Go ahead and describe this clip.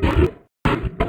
A large, simulated grunt of a Minotaur. Tags:
edited monster history creature growl beasts beast creatures growls scary creepy horror terror sci-fi science-fiction science